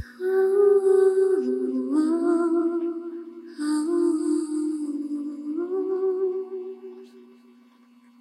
Nayruslove - Girl Vocalizing cleaned
However, it has this very strong gain buzz to it and I went to great lengths to get rid of it is a much as possible, so here it is.
voice, girl, female, humming, soft, vocal